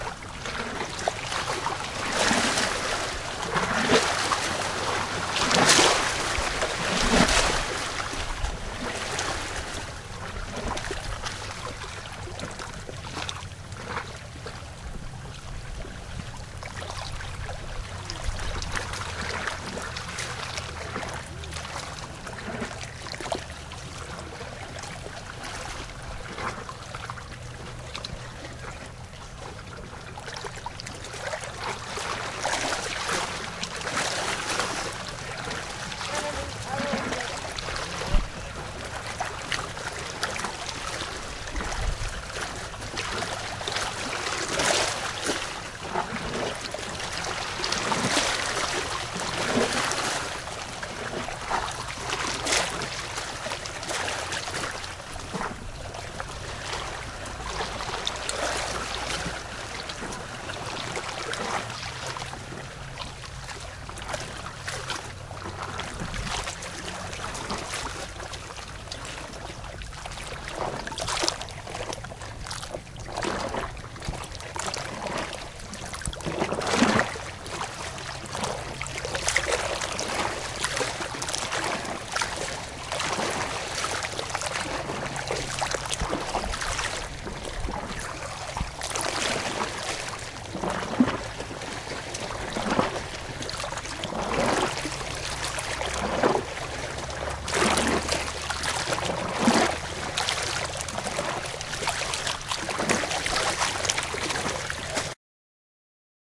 Ocean Sample
About third of the way through a group of people walked by and they can be heard talking for a few seconds. It was done with my SM57 plugged straight into my laptop.
ambient, relaxing